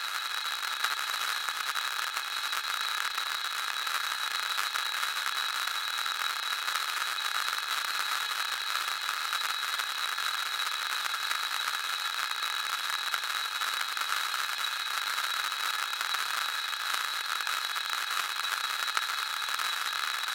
Steady State Fate Quantum Rainbow 2, Quanta, thru Intellijel Rainmaker
atmosphere, ambience, soundscape